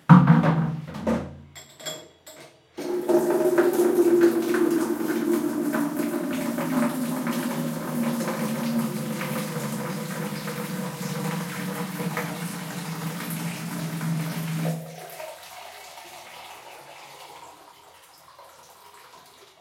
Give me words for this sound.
Bucket Tap water
Sounds recorded inside a toilet.